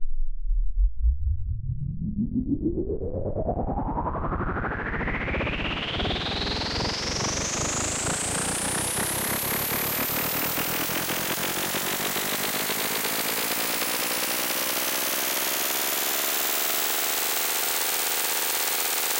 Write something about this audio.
Sci-Fi Engine - Light Cycle
Sci-Fi engine sound effect. I was trying to create a sound reminiscent of the Tron light cycles.
Fairly simple pitch sweep type sound overall, using filter and pitch modulation and envelopes. Synthesized in Synlenth1, resampled and recorded in Ableton Live.
This sound is composed of 5 individual pitch sweep sounds, each with different synthesizer settings, then panned and layered together.
drone, engine, light-cycle, pitch-sweep, sci-fi, tron